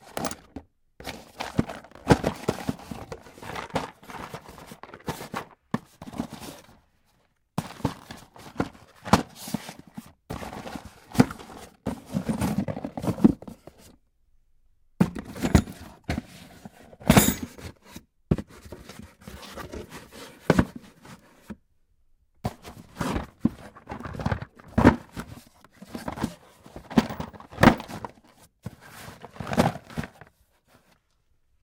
drawer wood open close searching through junk for keys rattle1
close, drawer, junk, keys, open, rattle, searching, through, wood